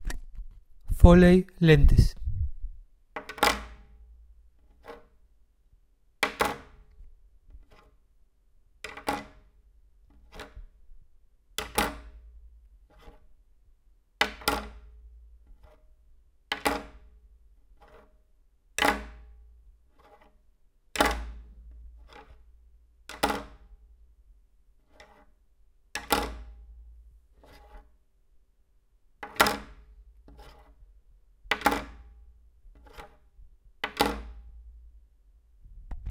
Glasses on table
Recorded with Zoom H1 for a short movie
foley, table